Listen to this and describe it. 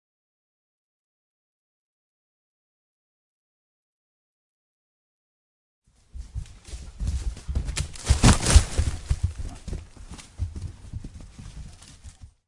running grizzly
a grizzly bear running past the recording device in stereo
bear, grizzly-bear, steps, stereo